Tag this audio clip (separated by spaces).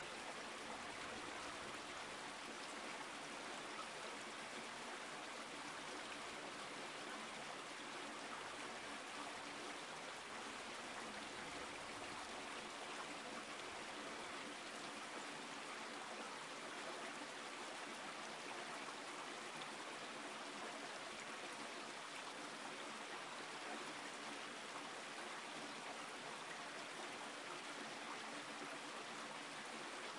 brook flowing water